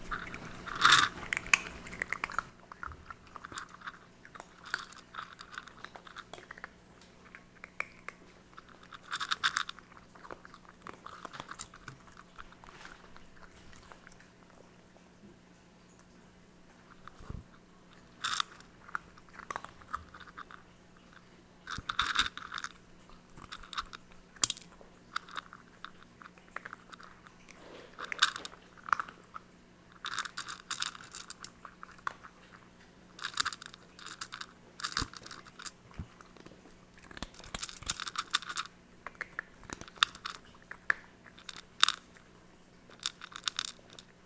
Cats eating dry food in the kitchen.
cats, chewing, crunching, eating